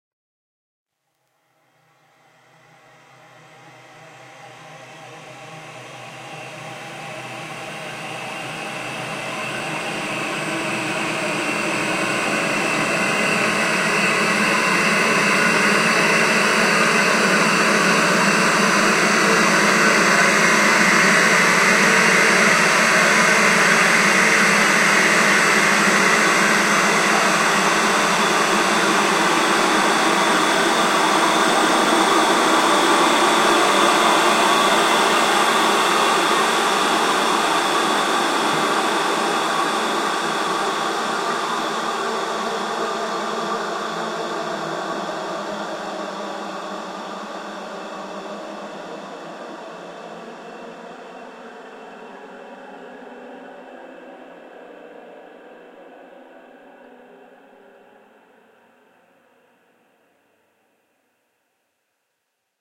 About one and a half minute of beautiful soundescapism created with Etheric Fields v 1.1 from 2MGT. Enjoy!
Ambient, Drone, Electronic